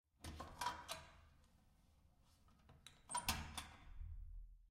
Door opens and closes
A door opens. And then it closes again. HOLY SHIT, no way!